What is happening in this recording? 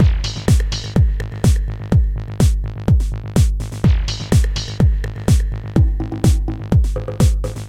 Sicily House Extra
Sicily House Beats is my new loop pack Featuring House-Like beats and bass. A nice Four on the Floor dance party style. Thanks! ENJOY!
chilled-house, house, bassy, dance, italy, 125bpm, four-on-the-floor, beat